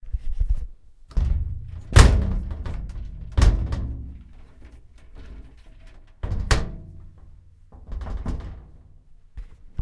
Rumbling Metal Drawer
ambient, drawer, industrial, metal